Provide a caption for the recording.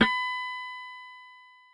fdbck50xf49Ldelay4msRdelay5ms
A short delay effect with strong feedback applied to the sound of snapping ones fingers once.
The delay was set to 4 ms on the left channel and 5 ms on the right channel which resulted in a quickly decaying, semi self-cancelling effect.
synthetic, cross, delay, feedback, echo